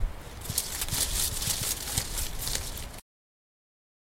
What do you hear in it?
trees rustling
Rustling trees in nature